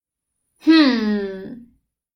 hmmm(thinking)
thinking, hmmm, humming